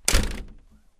Closing a hefty front door from the outside